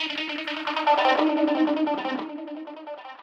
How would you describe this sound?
One I really like this. A hard guitar sound I made with my Strat - heavily processed with reverb, delay, and a Hi-pass sweep filter